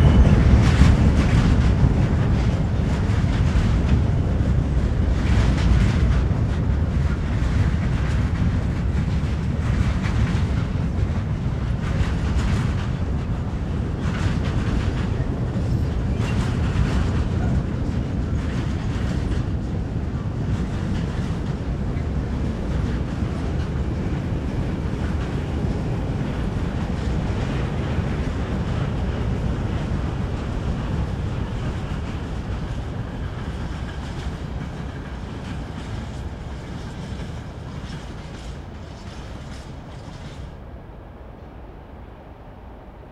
Field recording of train sounds
field-recording, railway, train